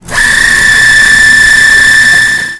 Coho - Coffee Grinder
This is a recording of the coffee grinder at the Coho. I recorded this with a Roland Edirol right next to the coffee grinder.